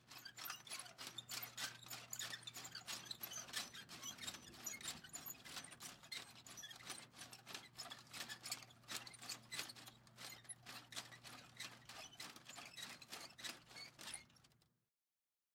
Plastic Squeaks and Creaking
High-pitched squeaks and creaks
creak, creaky, squeak, squeaky, squeaking, high, creaking